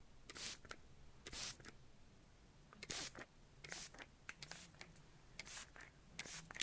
Sound of spy.